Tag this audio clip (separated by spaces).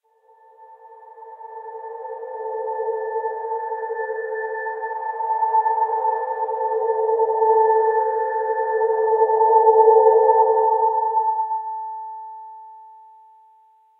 drone omenous